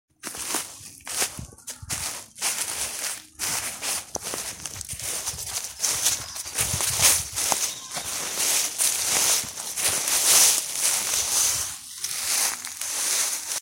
walking through autumn leaves
Walking through the crunchy autumn leaves
autumn, crunch, crunchy, foot, footstep, leaf, leaves, trees, walk, walking